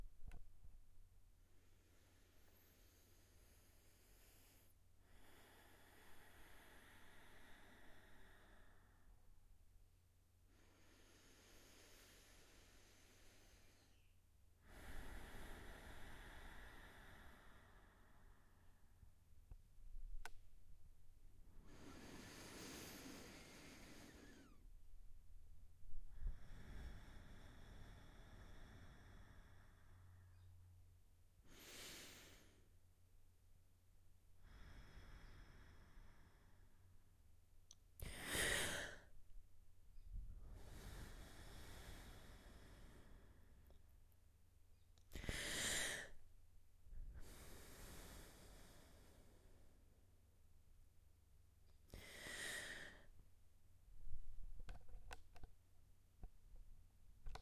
slow deep breath and sharp intake of breath (breathing)
Recorded this on a Zoom h4n. I take a couple of deep breaths then a couple of sharp inhalations (gasp).
breathing, slow, exhalation, sharp, inhalation